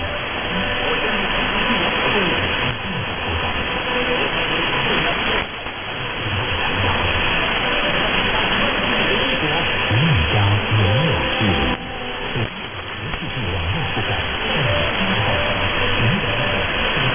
inter-station noise recorded from shortwave radio static through the University of Twente wideband short wave radio tuner website.